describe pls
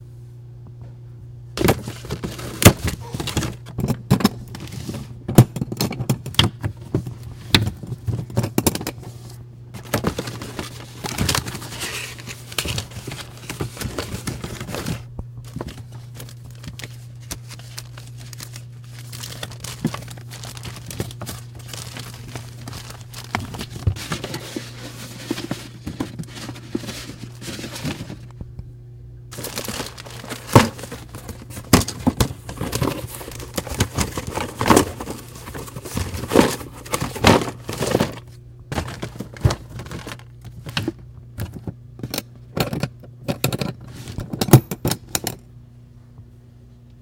digging through box
Shuffling through paper and containments of a big old trunk